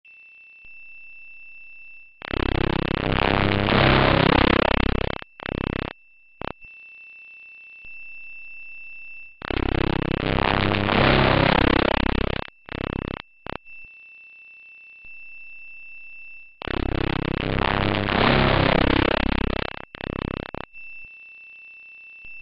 wierd render
I got this strange sound when i rendered a video a wile ago. I have no idea what caused it.
weird, humming, thrill, scary, sinister